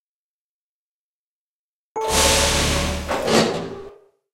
sas fermeture
sci-fi, hydraulic, science-fiction, close, machine, airlock